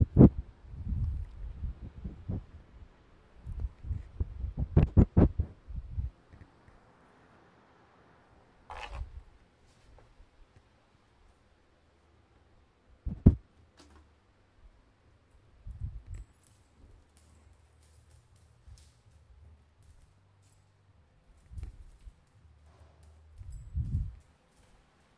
Abstract Soundscape Project